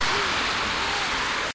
washington whitehouse snip
Snippet of sound from the Ellipse out front of the whitehouse recorded with DS-40 and edited in Wavosaur.
field-recording, road-trip, summer, travel, vacation, washington-dc